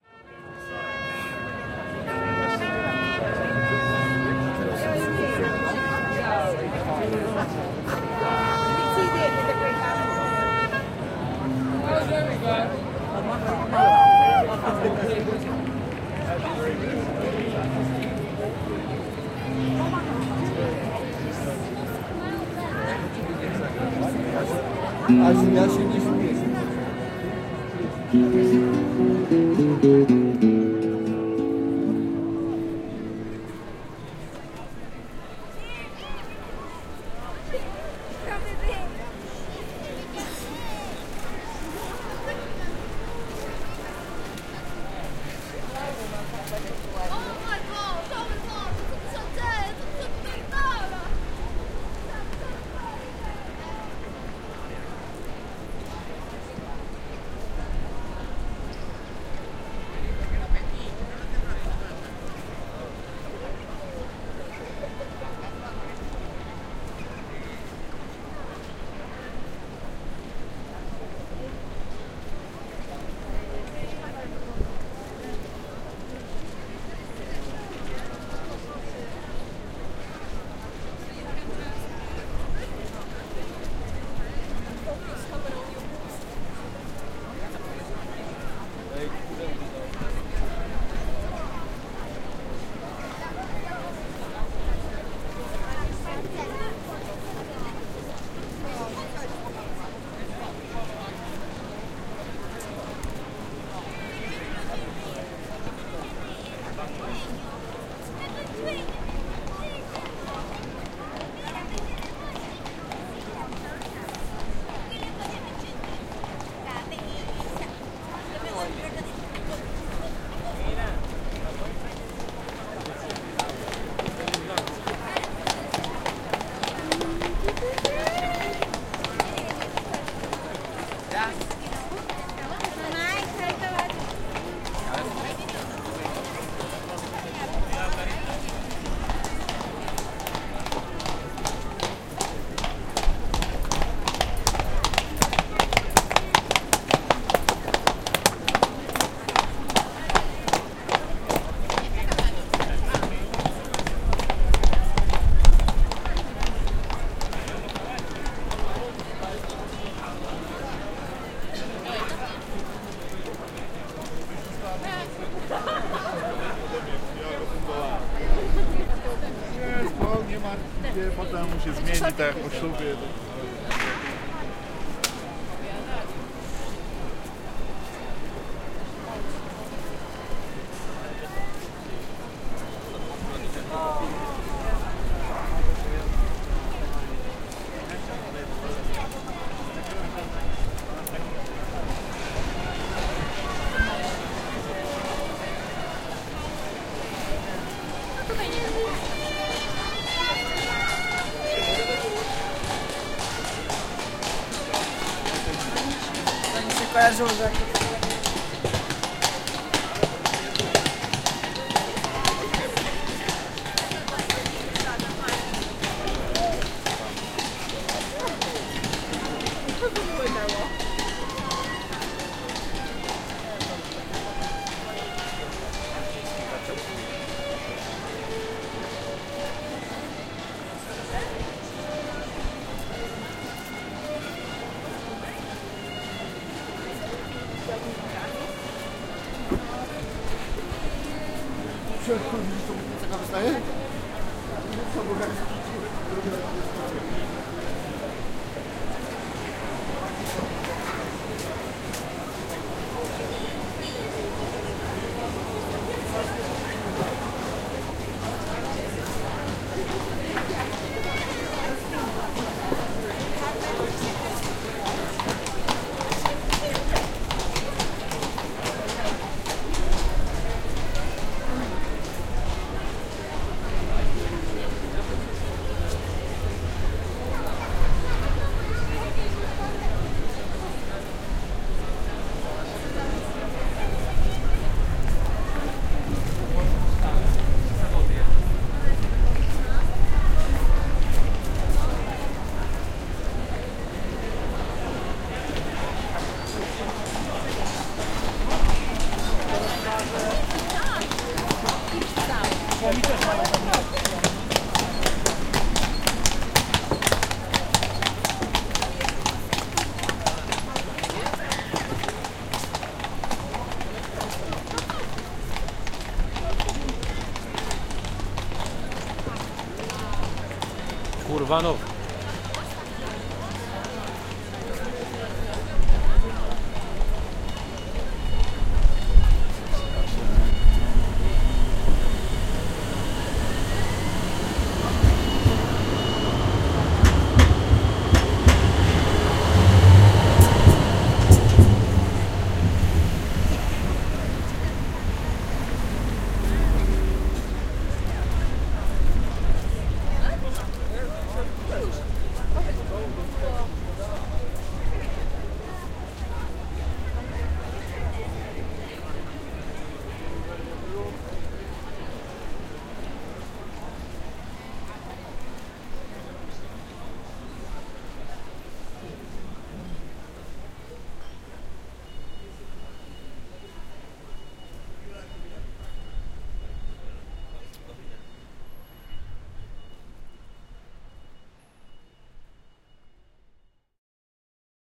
Cracow Old Town - soundscape of the lively atmposphere in Cracow's Old Town in Poland. You can hear many different sounds. I am sure the more times you will listen to it, the more you will hear :) [2017-07-24]
ambience, atmosphere, city, horses, music, old, people, town, violin